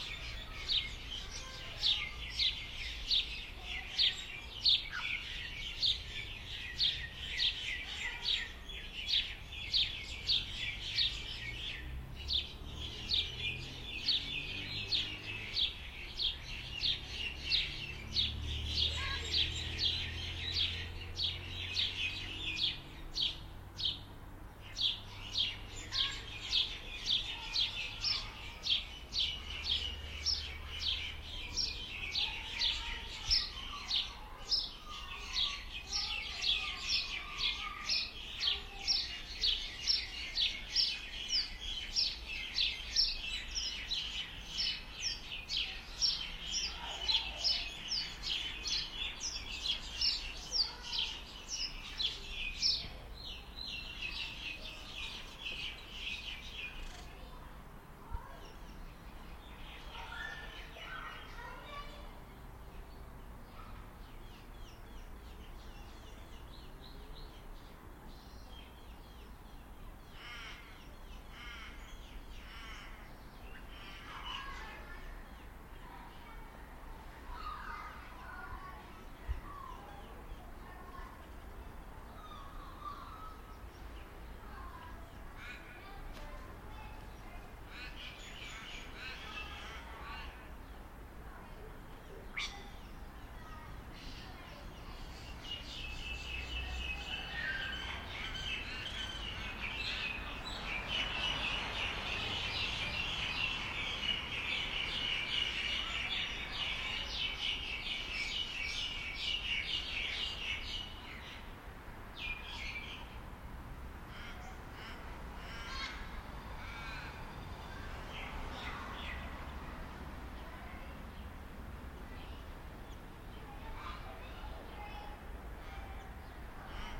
suburban atmos many birds
Suburban atmos. Wind through trees, bird calls.